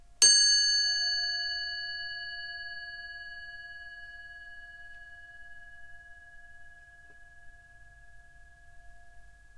a single tone from a metallic "chinese" chime, recorded on an Edirol R-09 without any processing or amplification.